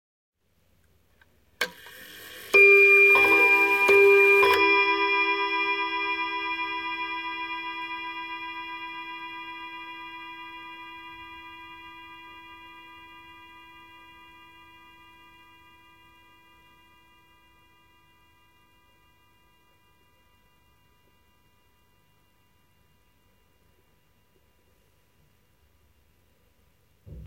Antique table clock (probably early 20th century) chiming two times.
pendulum, two, antique, time, o, clock, chimes, hour